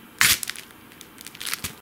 Sword stab 2
blade, cut, flesh, katana, knife, stab, sword, weapon